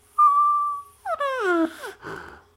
This is Ryan Driver playing the balloon for a recording project
Recorded November 2015 unto an Alesis Adat .
Air, Balloon, Blow